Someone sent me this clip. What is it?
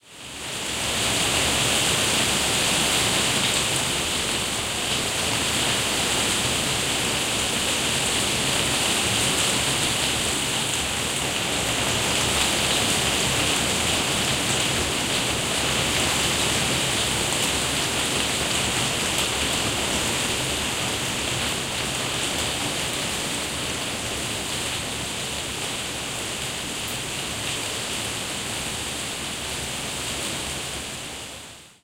Hail on roof
Hail stones on a conservatory roof
conservatory, hail, nature, rain, shower, weather